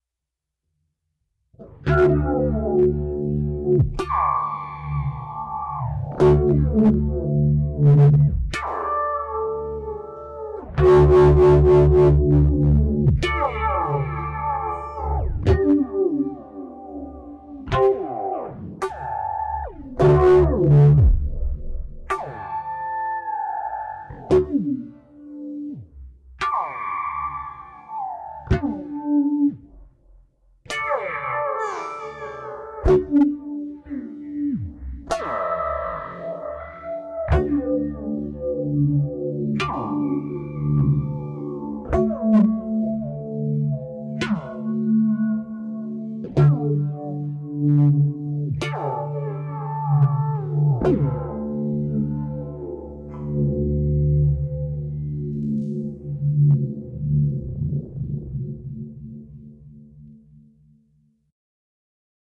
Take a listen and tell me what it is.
Heavy on the filter and modulation. With plenty of plink/clink/ping like sound at the end of the notes. Strange, weird and noise.